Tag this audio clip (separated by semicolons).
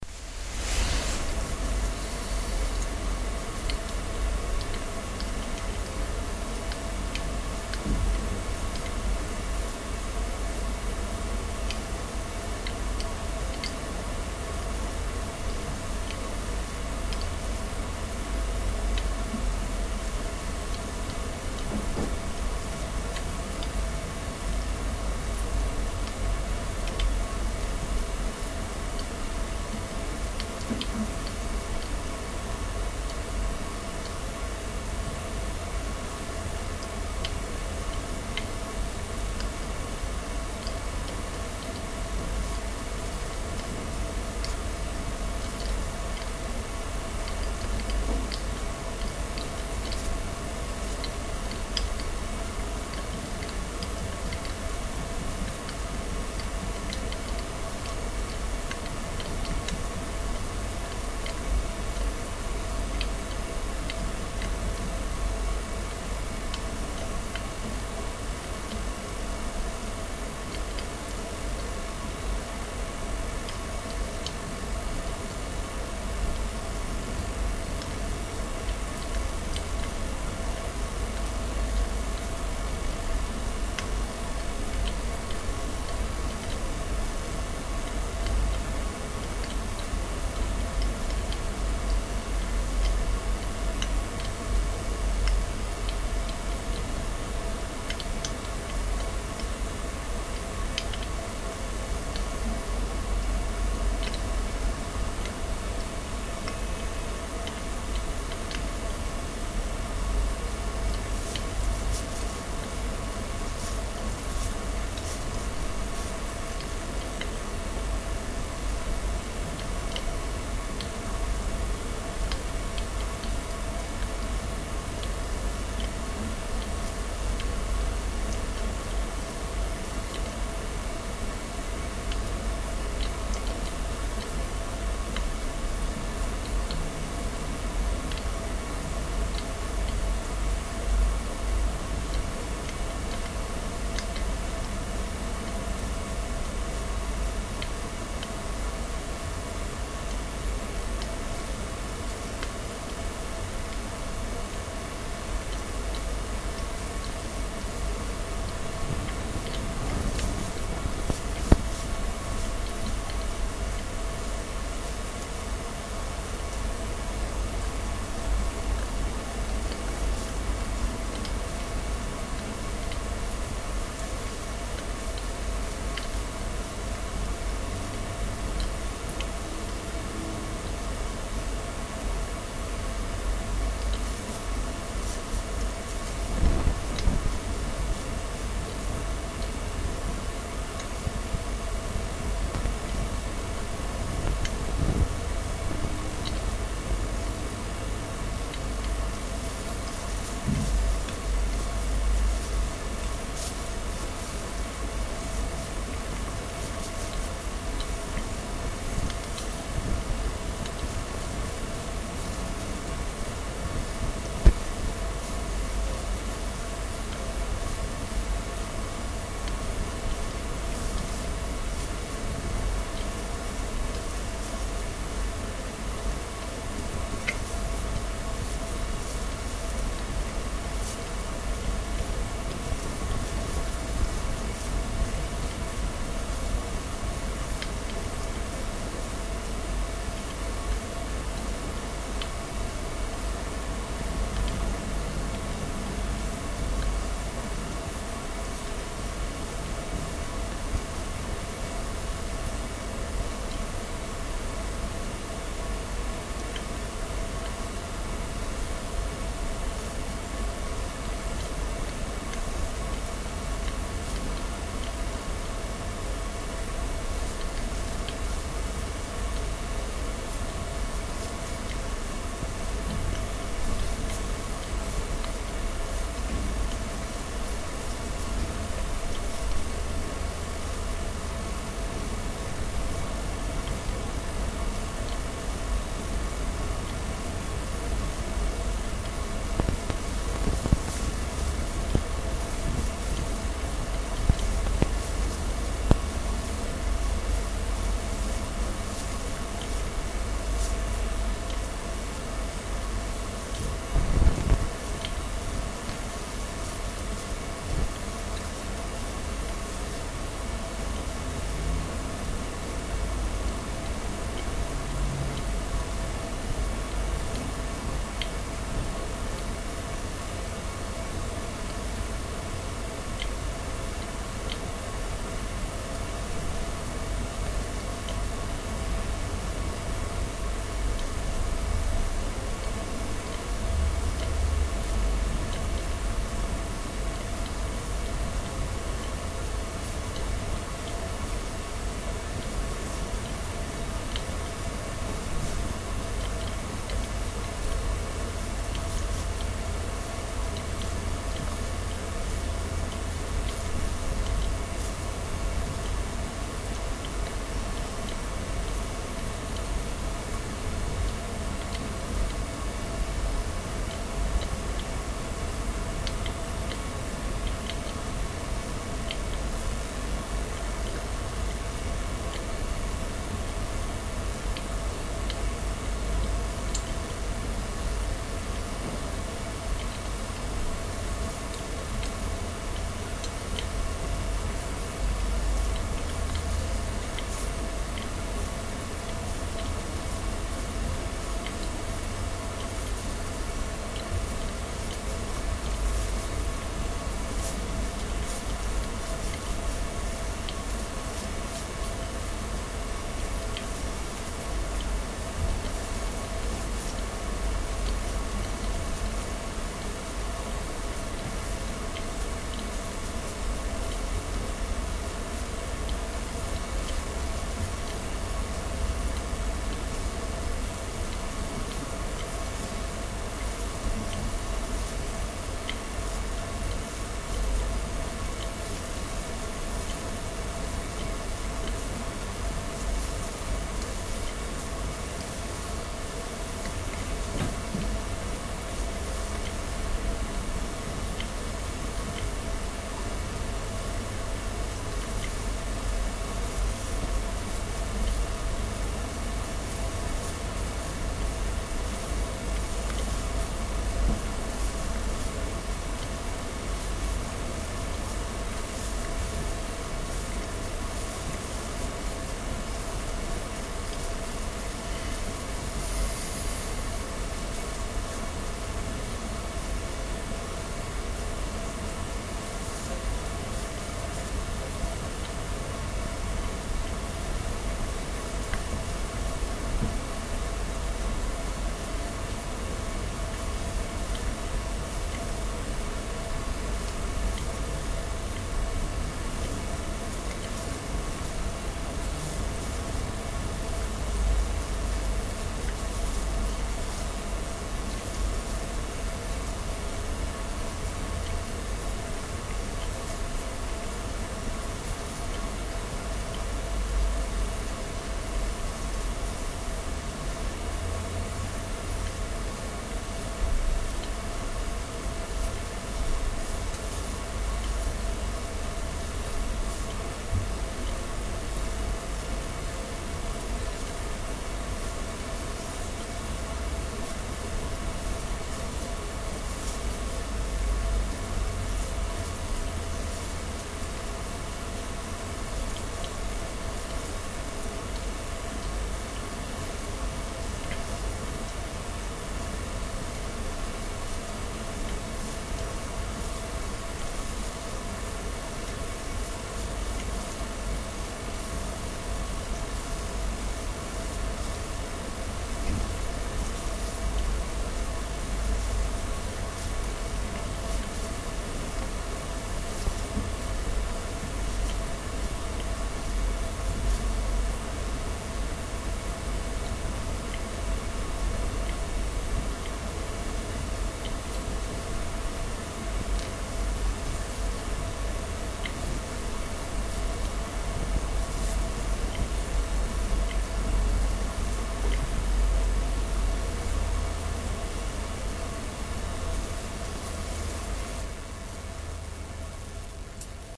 Node; Path; Wireless; Optical; Trail; Battery; Wimax; Band; Xatamine; Atmospheric; Reluctor; Jitter; Wifi; Beam; T1xorT2; Dual; Lens; Synchronous; Iso; Channel; NOx; Fraser; SOx; c; Actroid; T2; COx